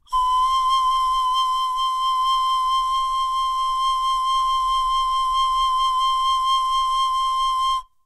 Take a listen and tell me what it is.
long tone vibrato pan pipe C3
c3, pan, pipe